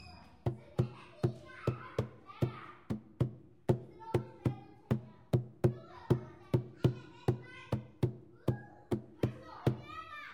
Single Drum Kids in BG
recorded on a Sony PCM D50
xy pattern
BG, Drum, Kids, Single